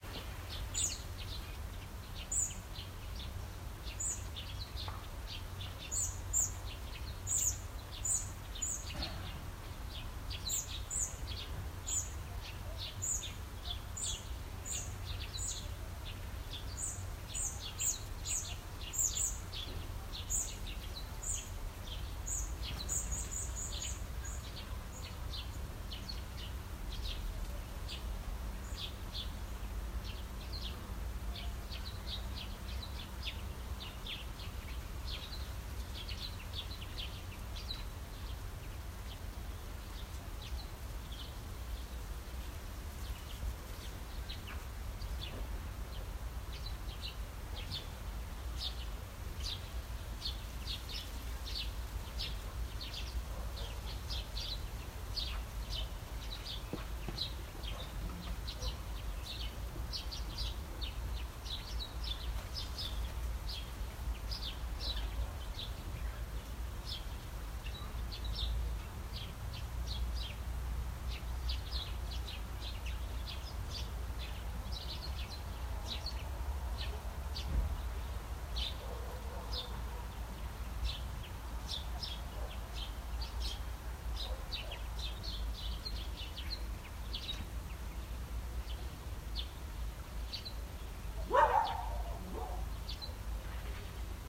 Foley, Street, Village, Birds, Distance Dog
Bird, Birds, Distant, Dog, Nature, Village